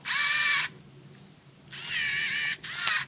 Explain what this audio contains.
MinoltaV300Zoom1
Zooming the lens/focusing on a Minolta Vectis-300 APS film camera. There are several different sounds in this series, some clicks, some zoom noises.
film-camera, focus, minolta-vectis, photo, servo, vectis, zoom